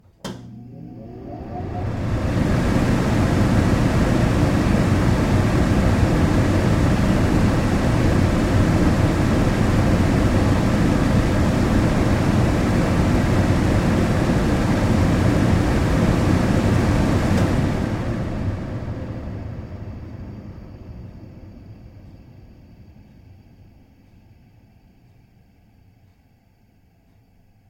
This is my kitchen stove exhaust fan, close miked.
Mic: Sennheiser MKH 416